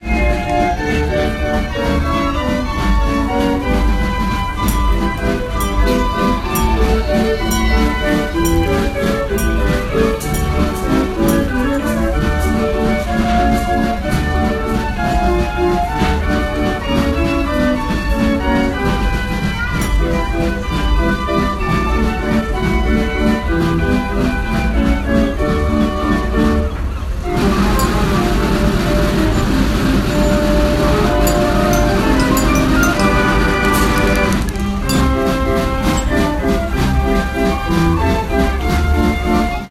carousel brighton
The carousel of brighton beach.
amusement, brighton, carousel, drehorgel, fair, faire, fairground, hurdy-gurdy, karussell, merry-go-round, rides, street-organ